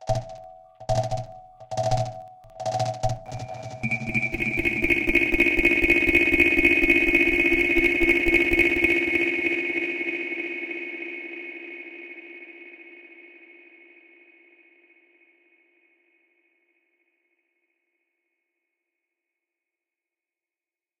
sounds like a freaky grasshoper